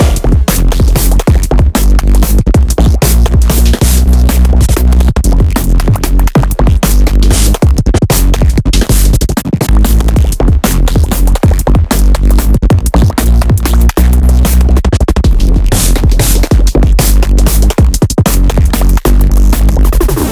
nasty D'n'B loop. 189bpm.